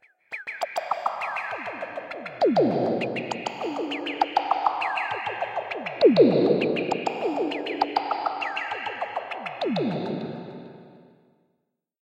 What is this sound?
THE REAL VIRUS 04 - RESONANT FREQUENCY LOOP 100 BPM 4 4 - G#5
High resonant frequencies in an arpeggiated way at 100 BPM, 4 measures long at 4/4. Very rhythmic and groovy! All done on my Virus TI. Sequencing done within Cubase 5, audio editing within Wavelab 6.
multisample, 100bpm, groove, loop, sequence, rhytmic